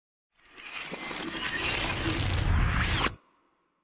A layered whoosh sound that goes from metal to crunchy paper to punchy end. With Reverb.